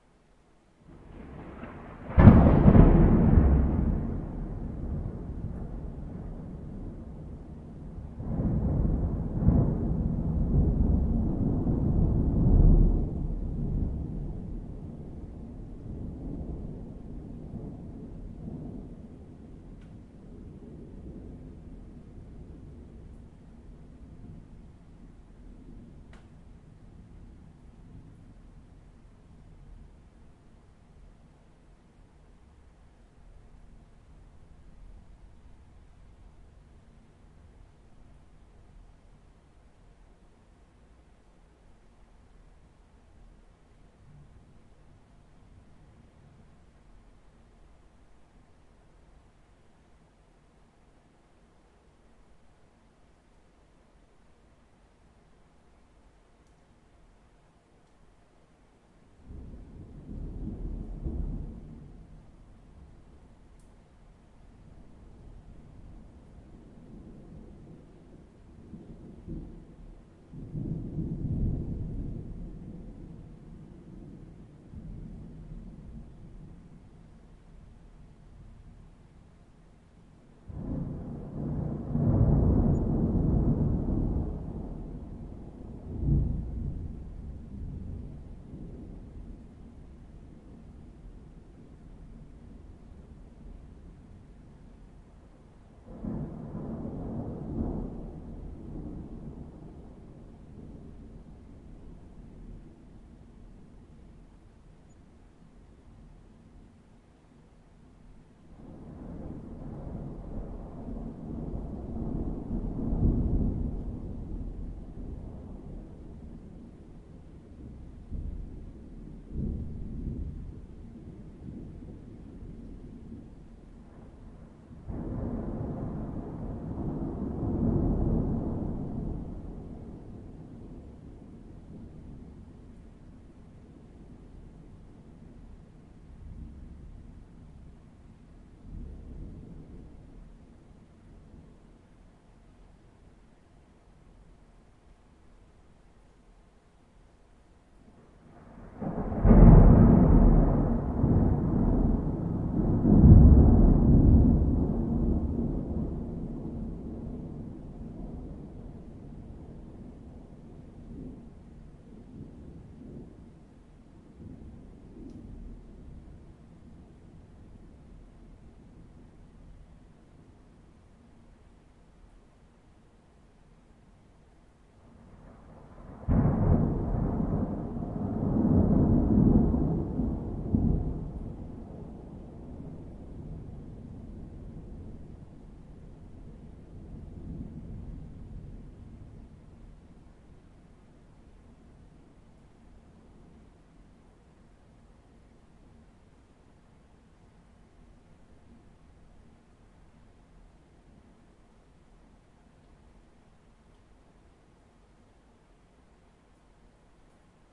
Genova-Lunedi'notte
A small piece of the heavy thunderstorm that hit Genova (Italy) the night between monday the 7th of November 2011 and the morning of the 8th. Unprocessed. Recorded with a Edirol R9 from inside.
Thunderstorm, rain, heavy, wind